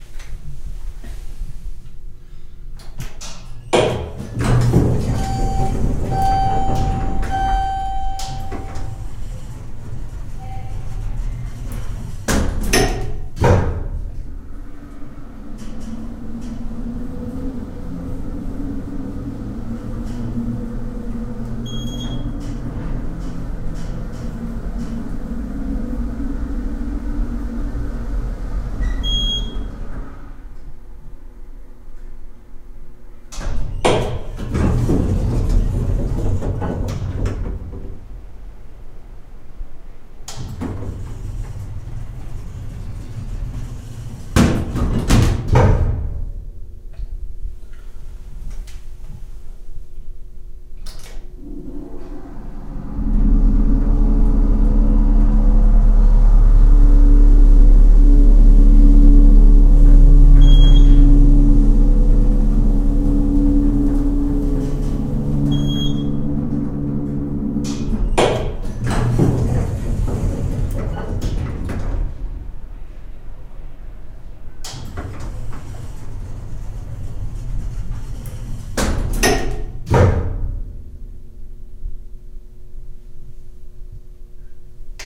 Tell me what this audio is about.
Elevator ascending two floors, peaking on doors and electric motor sound
Elevator ascending two floors. Peaking when the doors open/close, and when an extra motor sound begins. Recorded at Shelby Hall, The University of Alabama, spring 2009.
elevator, field-recording, motor